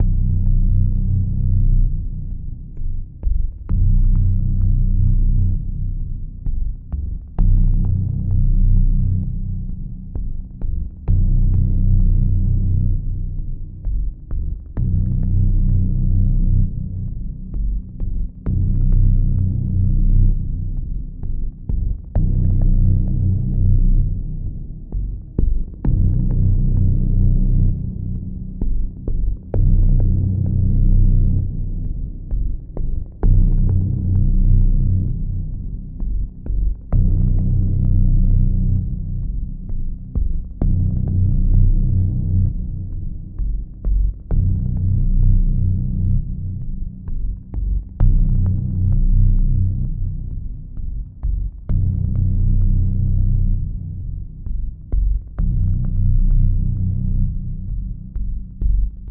Here is a deep dark pulsing drone ambiance made in FL Studio 12. Perfect for a suspense-filled scene in an action or thriller movie.